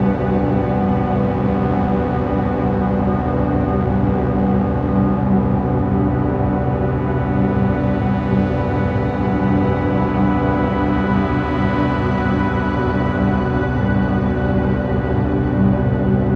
Analogue Pt1 21-1
old, pad, prophet08, string